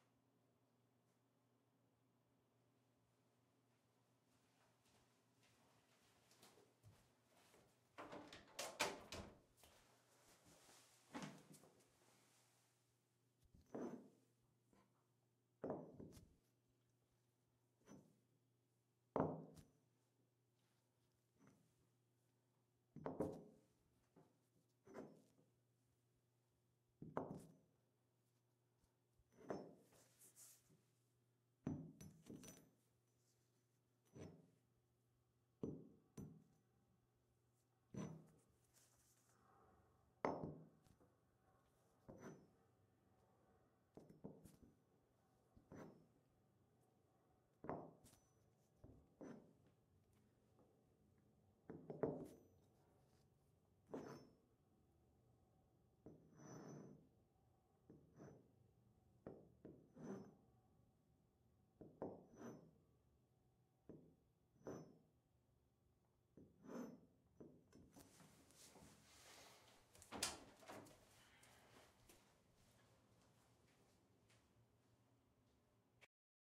Hammer handling foley
The sounds of a hammer being picked up and handled on and off tile.
dead-season, foley, hammer, hand, tile